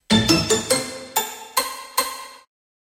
Short win result simple sound
animation, cinematic, end, fanfare, film, game, lose, movie, win, wrong